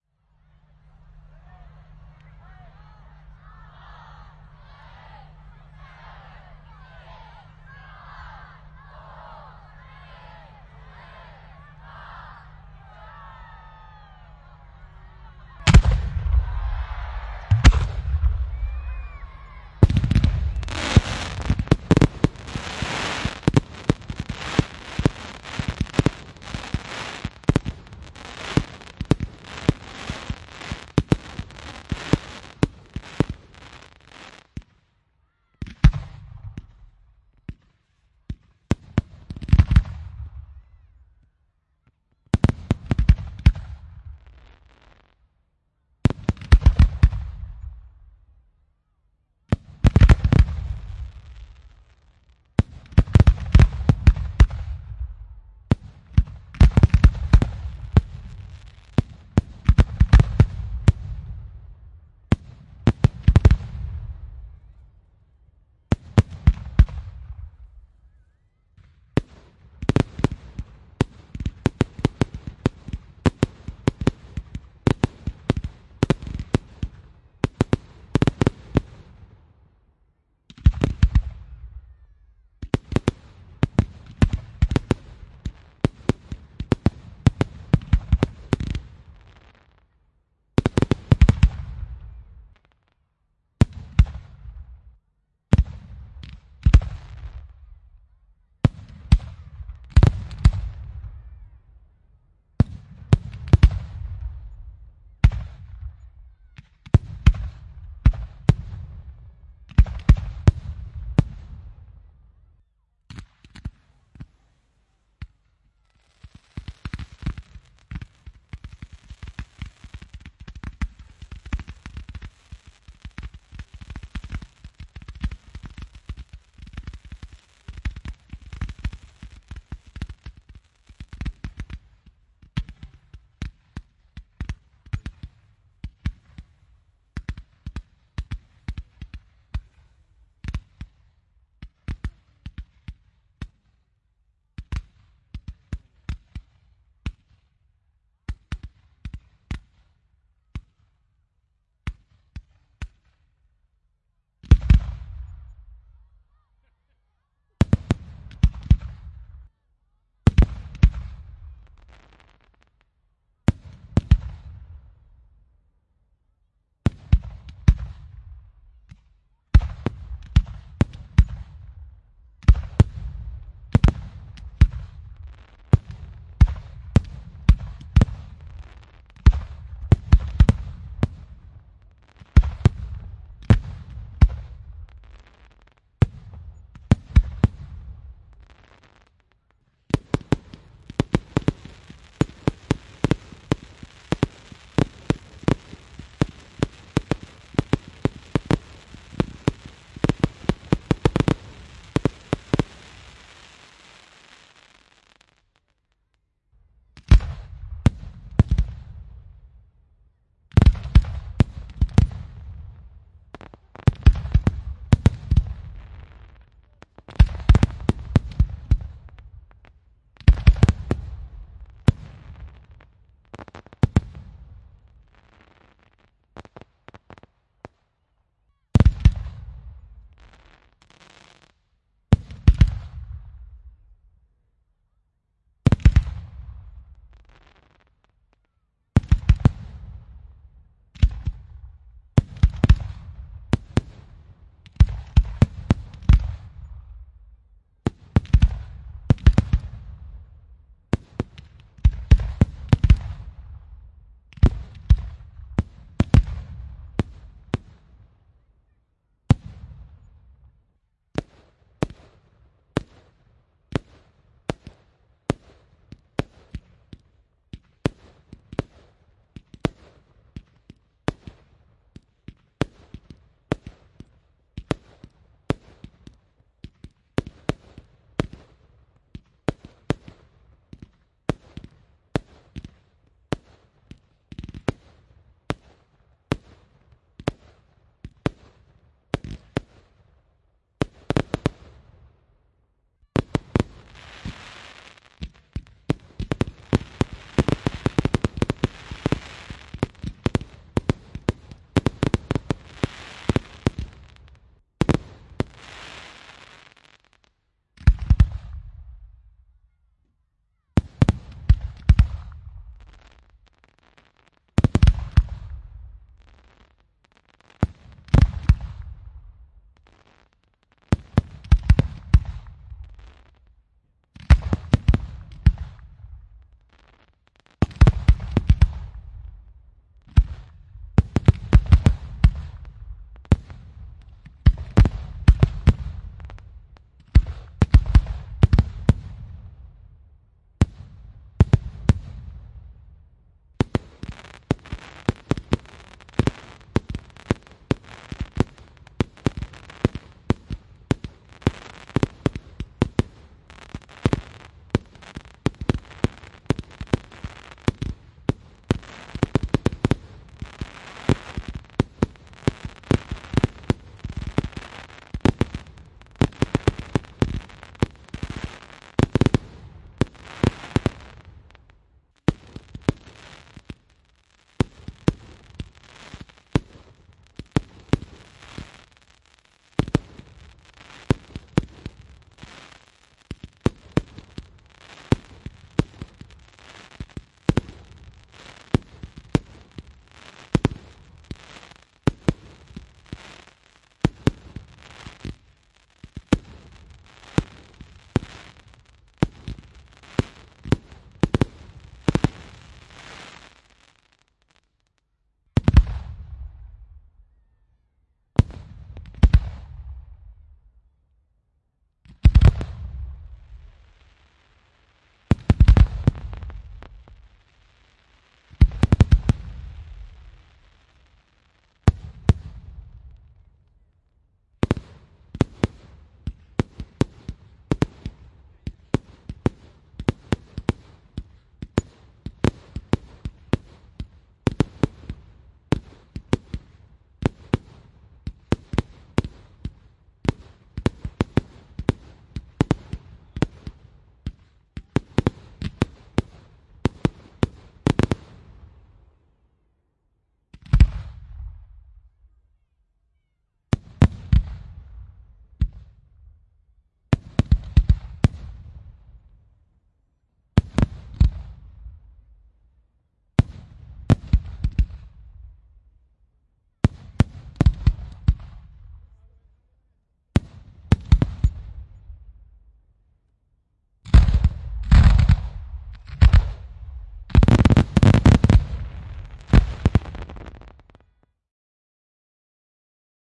Firework display recorded in Gloucestershire (UK) village - 5 November 2017. Various rockets, fizzers etc. Segments can also be used as explosions, gunfire etc. WARNING: LOUD!
Recorded using Rode NT4 in Rode Blimp2 into Zoom F4
4th-July, 5th-November, Bangs, Explosions, Firework-display, Fireworks, July-4th, November-5th, Rockets